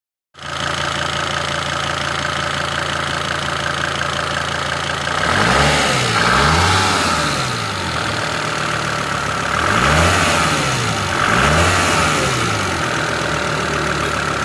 Diesel engine idle and gas
Recorded from a Mercedes.
car, diesel, Engine, gas, motor